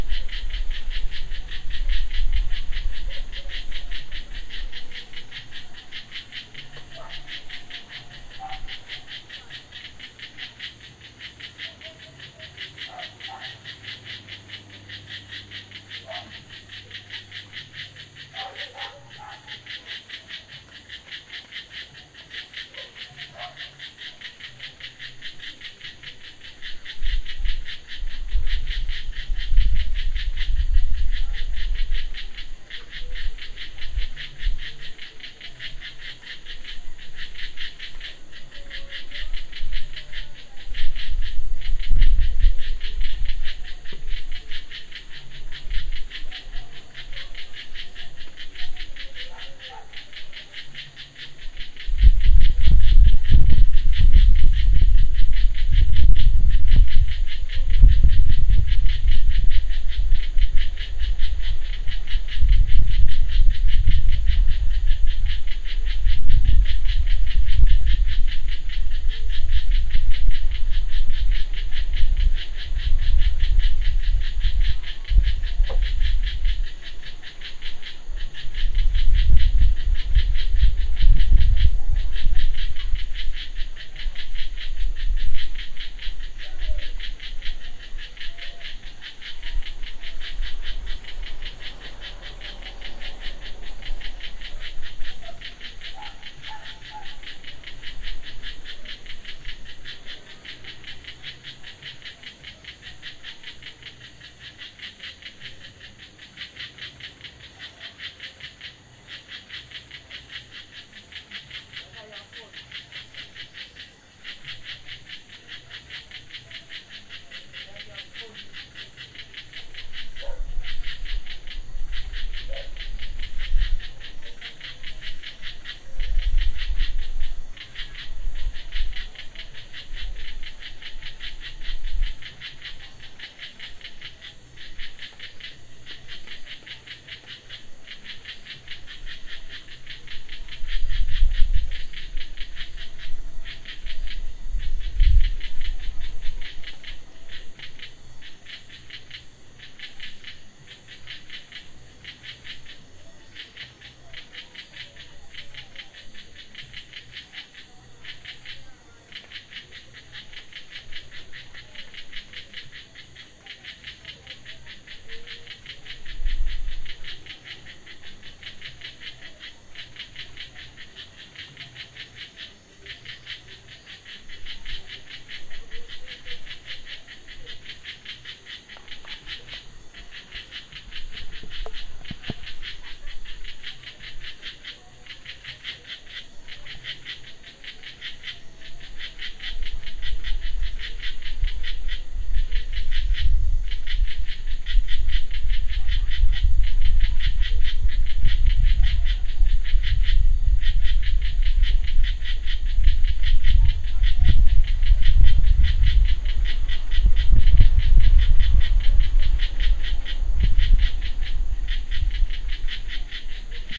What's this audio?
soufriere soir
Night time on the porch in Soufriere, St Lucia, with the cicadas kicking up a crazy beat - recorded with the FiRe application on iPhone and a Blue Mikey.
cicadas, lucia, night, soufriere, st